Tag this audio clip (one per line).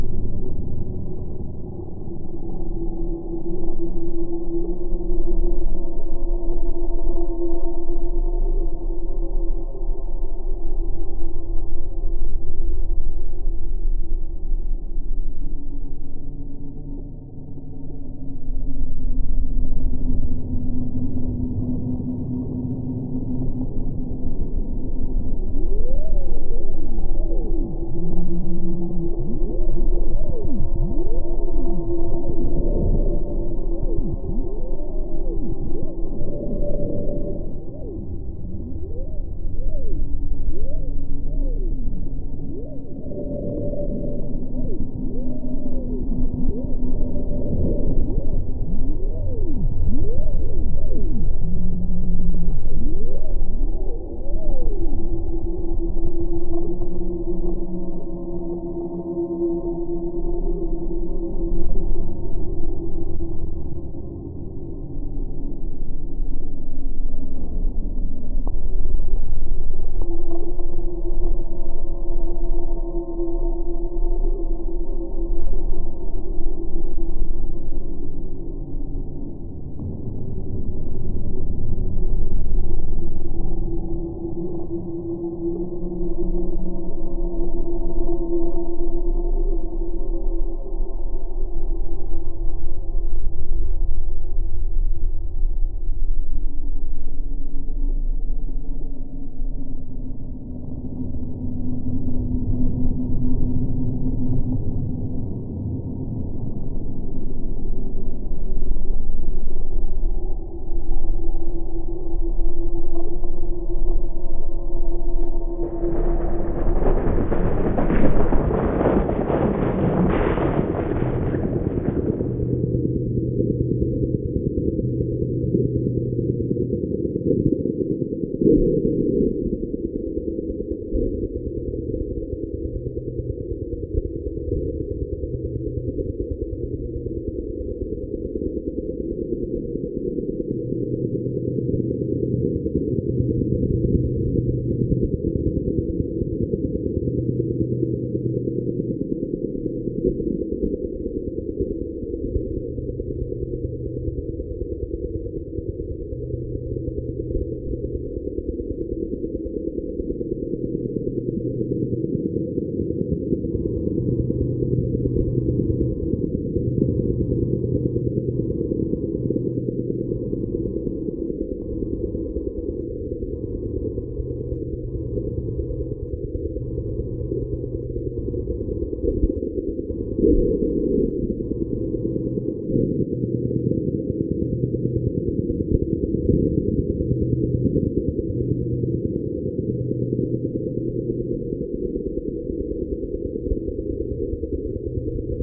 ambience
ambient
atmosphere
claustrophobic
cold
communication
cosmic
drone
field-recording
hyperdrive
hyperspace
industrial
interior
interstellar
radio
sci-fi
soundscape
space
spaceship
transmission
vessel